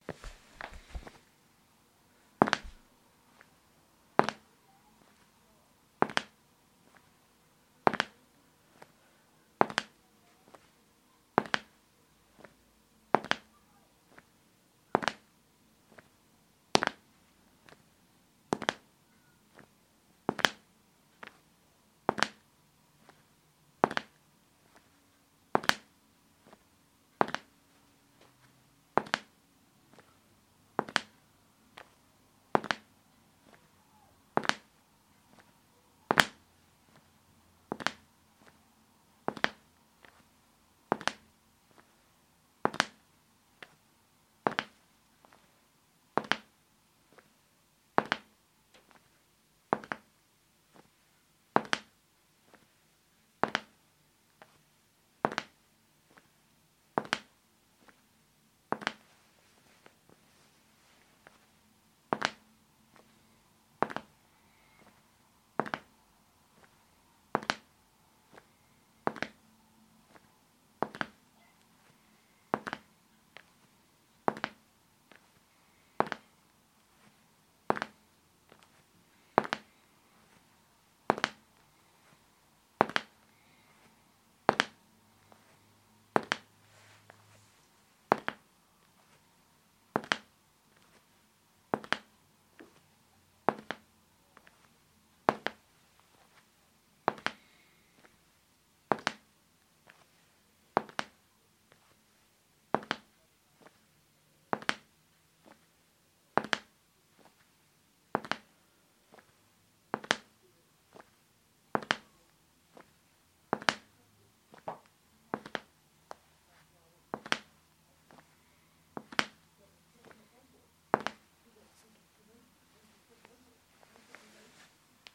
Footsteps, Shoes, Tile, Slow
Hard-soled shoes on a tile floor with a slow pace. Recorded using a Shure SM58 microphone.